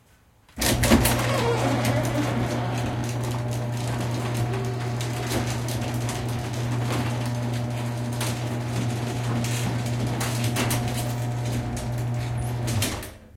Quad (L,RLsRs) of a mechanical garage door opener opening the door. Very squeaky while opening. Recorded at a near perspective. Recorded with a Zoom H2n in surround mode.